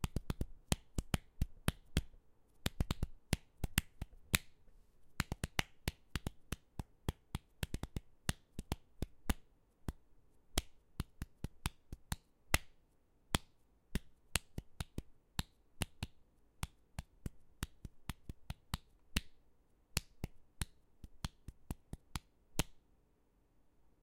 Chest Drum
A recording of my boyfriend slapping a tune on his chest like drums.
beat, chest, drum, smack